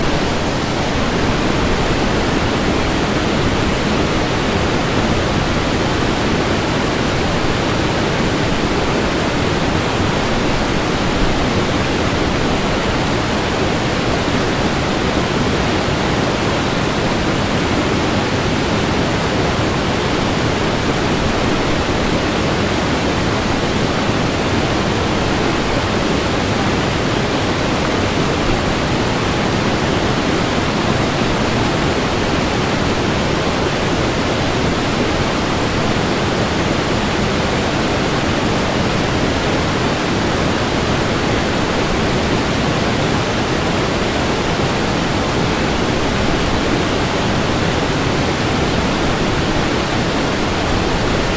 Perched about 25 feet over Alberta Falls. Recorded in Rocky Mountain National Park on 19 August 2008 using a Zoom H4 recorder. Light editing work done in Peak.